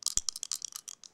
Dice Shaking in Hand
A pair of dice being shaken around in someone's hand